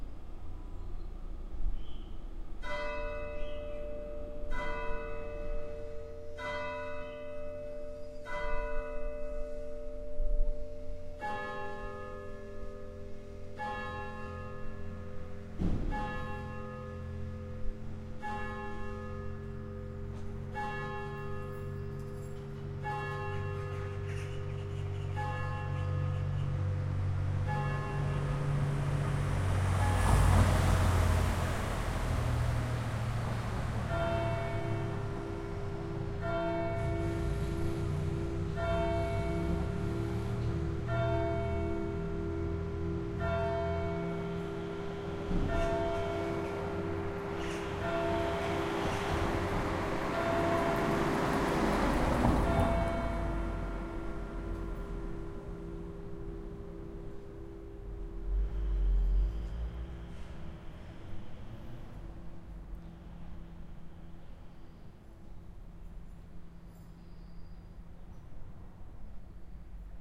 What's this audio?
ingelfingen 9oclock churchbells
9:00 o´clock churchbells in a small village in southern germany. Unfortunately a car drives by at the beginning and fades into the first bell, but that´s street recording...
atmosphere, churchbells, field, recording, street